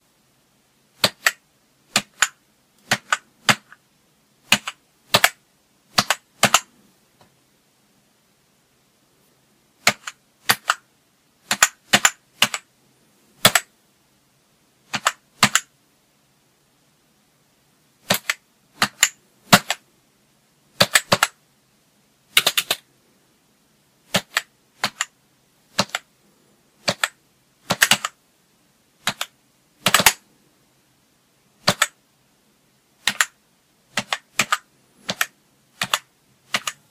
Hunting and pecking on an old computer keyboard
aka, pretty much how everyone's Dad types. (I used an old Gateway 2000 keyboard, circa 1994, for this, in case anyone's curious.)
computer, hunting, hunting-and-pecking, keyboard, pc, pecking, slow, typing